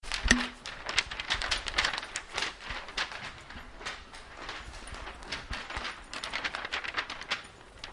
session 3 LBFR Mardoché & Melvin [5]
Here are the recordings after a hunting sounds made in all the school. Trying to find the source of the sound, the place where it was recorded...
france, labinquenais, rennes, sonisnaps